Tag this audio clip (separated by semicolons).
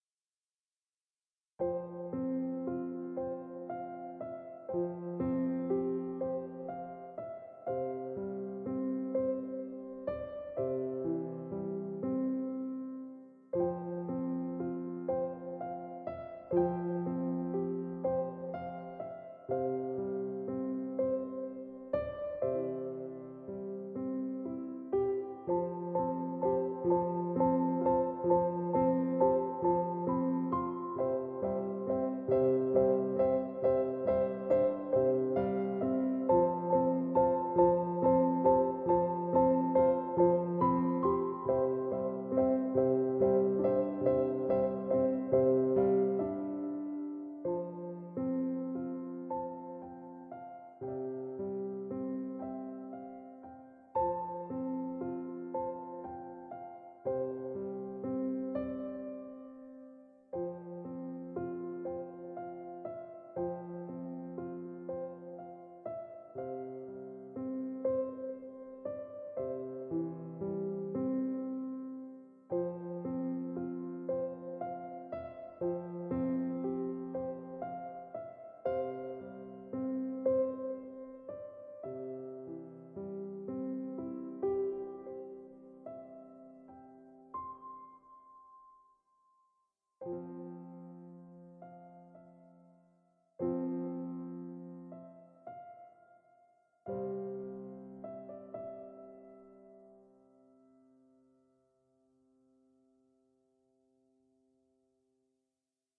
anime; background; calm; despair; hope; instrument; love; Melancholy; melody; moving; original; ost; piano; sad; sadness; soft; soundtrack; sweet; touching; waiting